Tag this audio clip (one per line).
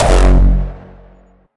bass
hardstyle